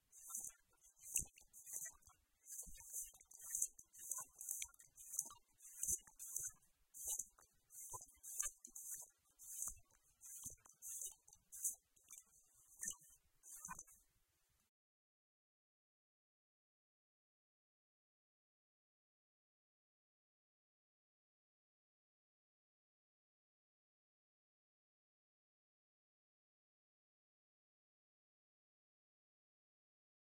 Pages Flip Fast-St
Equipo:
Mic: Sennheiser Shotgun Mic
Tape Recorder: Zoom H4N Pro
Sonido: El sonido de las paginas siendo volteadas rápidamente
Sound: The sound of pages being flip very fast
Como: Apuntamos el mic directamente a las hojas
pages, movimiento, paginas, flip, fast, rapidamente